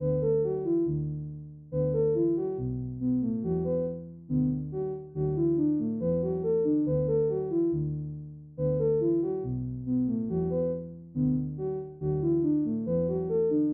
melody 140bpm
140bpm, accord, melody, synth